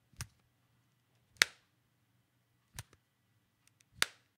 Opening and closing a flip phone twice